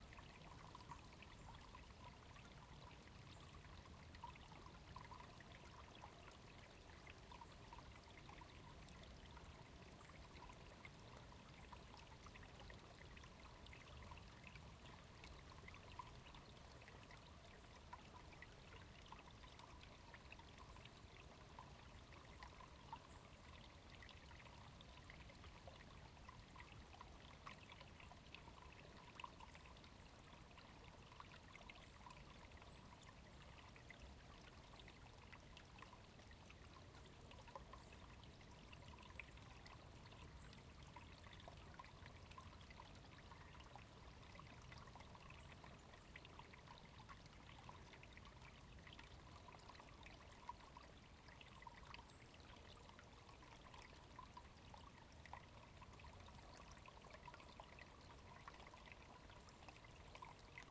Wasser plaetschern
You can hear the water of a little river in a forrest